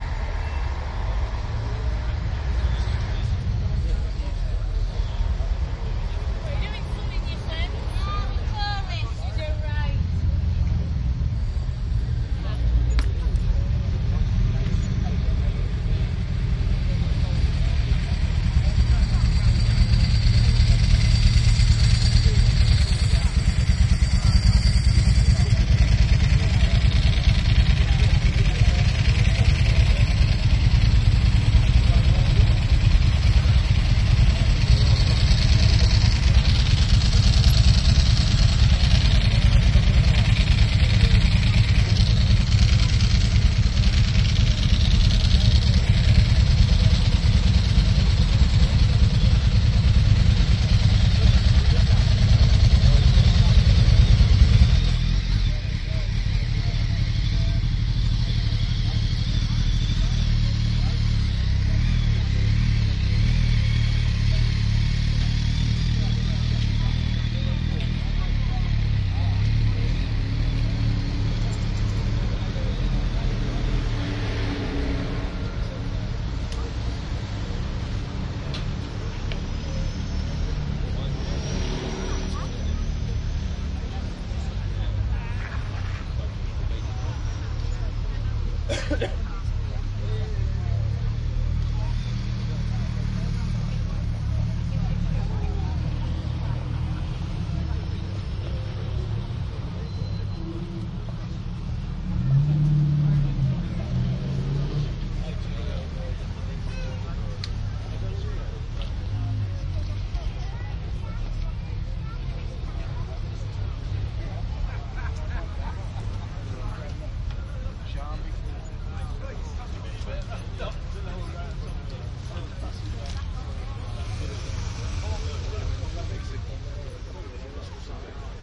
Market town motorbikes
Binuaral recording of motorbikes and ambiance in a North Yorkshire market town, UK. Recorded using a home-made binaural set made using Primo EM-172 capsules into a Zoom H2.n
binaural, motorbike, motorcycle, north-yorkshire, primo-em172